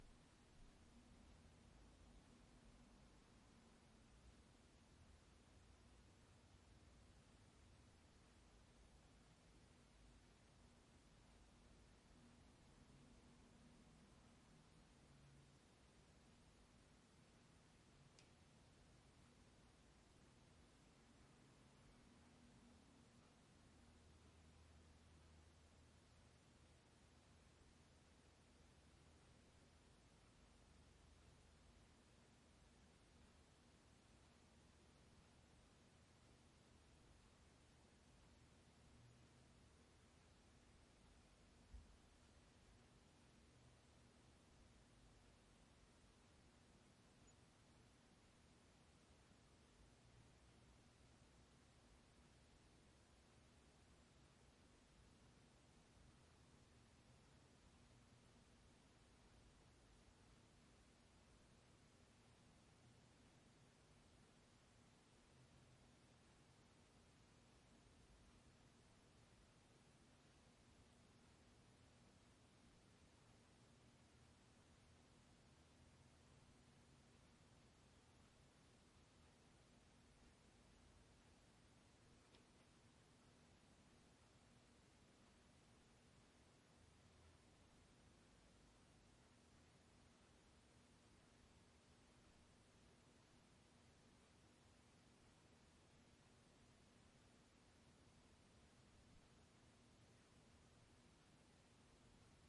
Room tone living room-7eqa 01-02
Recorded with Zoom H4N, ambience recording. Basic low cut filer applied.